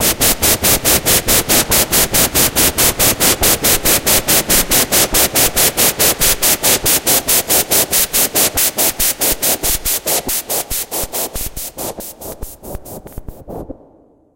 A noise falling.
Ambiance, Ambience, Ambient, AmbientPsychedelic, Atmosphere, Cinematic, Falling, FX, Noise, Processed, Sci-fi, Trance